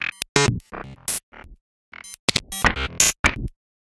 BuzzBleeps 125bpm05 LoopCache AbstractPercussion
Abstract Percussion Loop made from field recorded found sounds
Loop, Percussion, Abstract